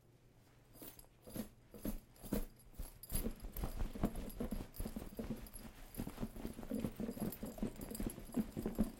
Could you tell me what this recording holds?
Backpack Movements
Backpack, Movements, Run, walk